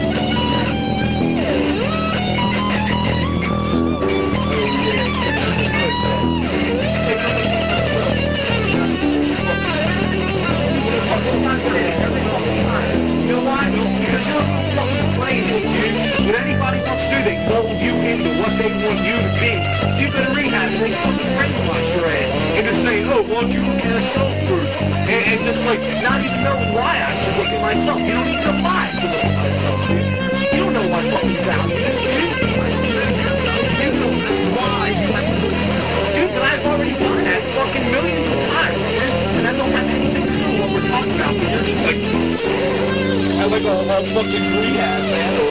peace and anarchy7
Another set of argument snippets from a different cassette recording of band practice tapes from the late 80's. Recorded with the built in mic on a little cassette recorder. A false hope... the argument cools down slightly and becomes inaudible for a moment, then without warning, it swells again. We continue our tedious rehearsal despite the obvious distraction.
angry, argument, human, lofi, peace-and-anarchy